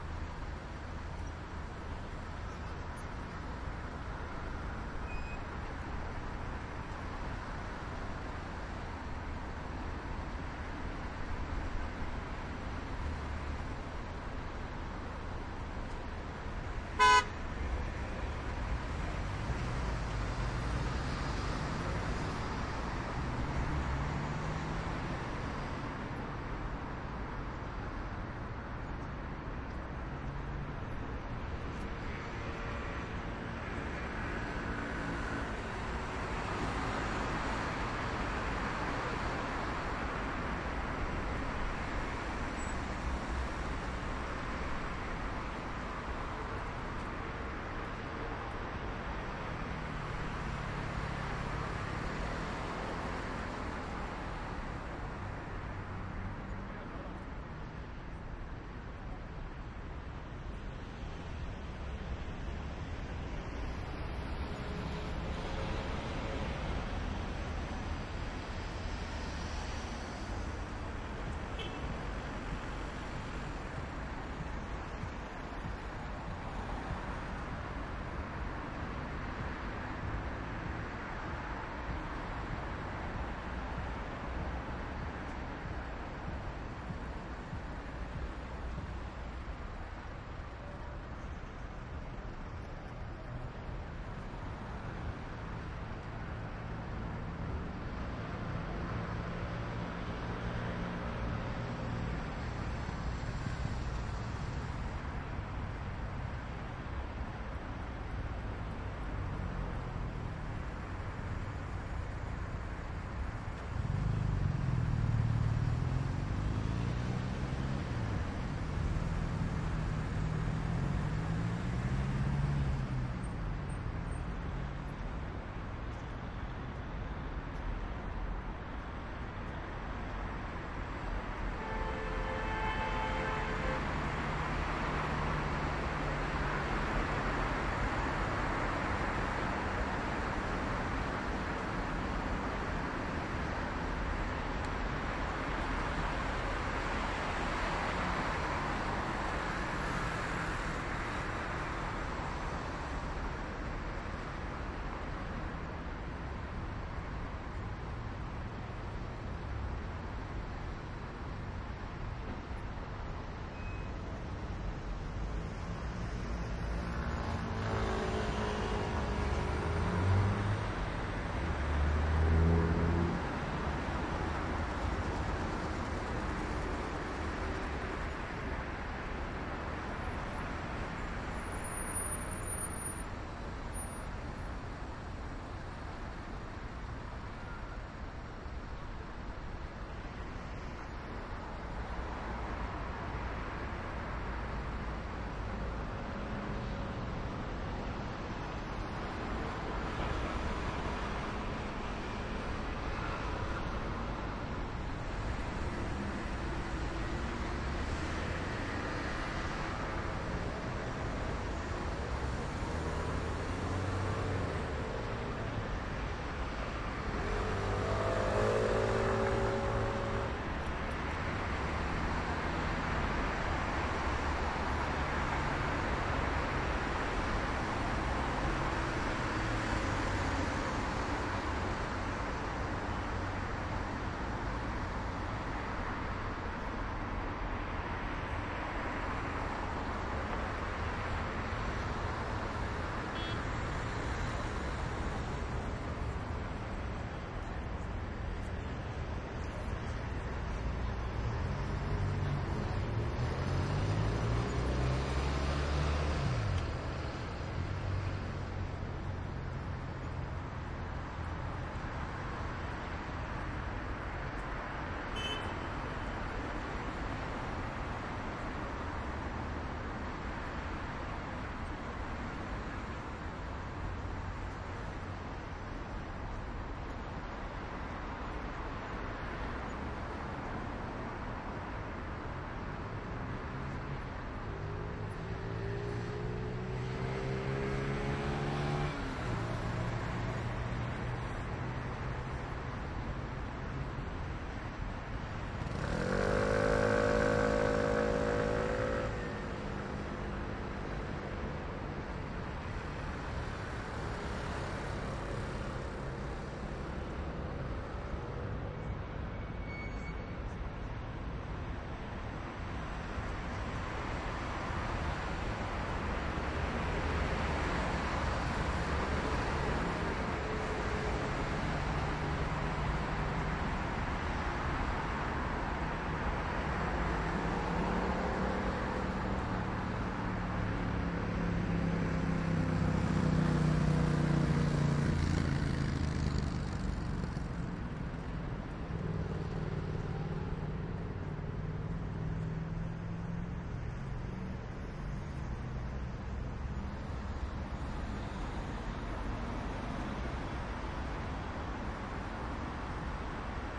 Madrid Plaza Castilla recording (Boulevard), close sound perspective. Medium traffic, close to the square, medium speed cars, siren, motorcycle, brakes, medium noise pedestrians.
Recorded with a Soundfield ST450 in a Sound Devices 744T